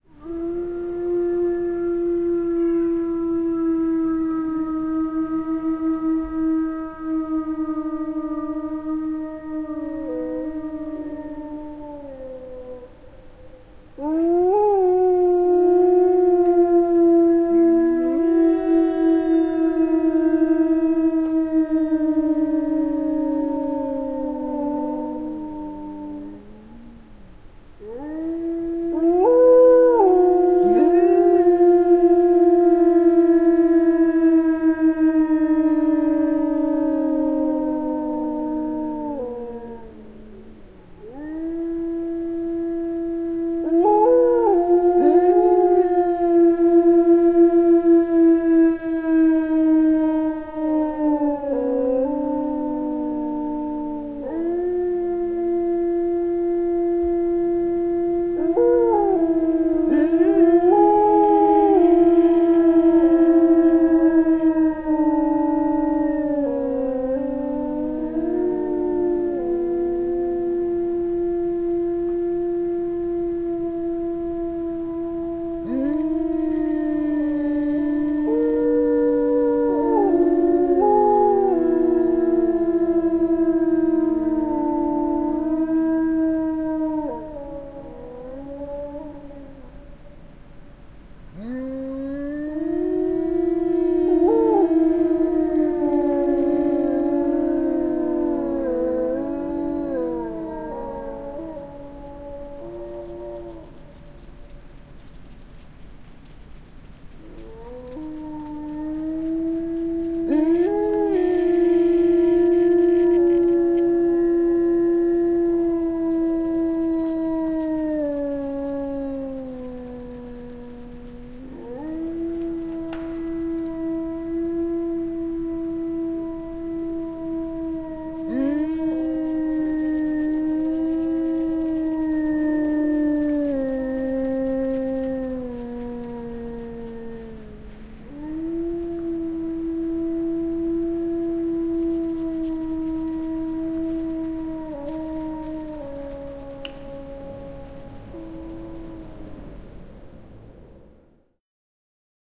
Sudet ulvovat / Wolves howling, small pack, frost snapping
Pieni lauma susia ulvoo. Välillä pakkanen naksahtaa puissa.
Paikka/Place: Suomi / Finland / Ähtäri
Aika/Date: 21.04.1994
Animals, Field-Recording, Finland, Finnish-Broadcasting-Company, Soundfx, Suomi, Susi, Tehosteet, Wild-Animals, Wildlife, Wolf, Yle, Yleisradio